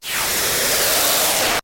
an angry synthesized dog and cat going at it.
TwEak the Mods